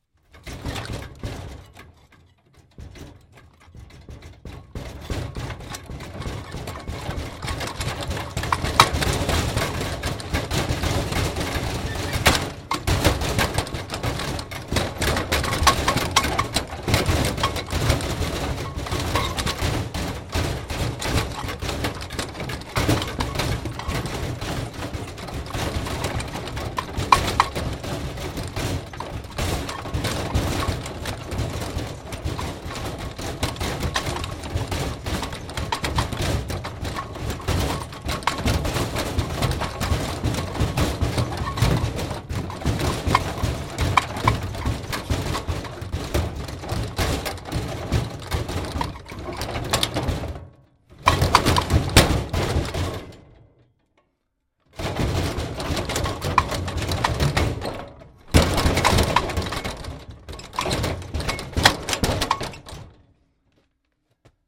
metal rollcage rattle 4 heavy
Foley SFX produced by my me and the other members of my foley class for the jungle car chase segment of the fourth Indiana Jones film.
heavy, metal, rattle, rollcage